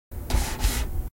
Mousepad Slide
Sliding of a keyboard mouse on a mouse-pad
computer, slide, pad, mouse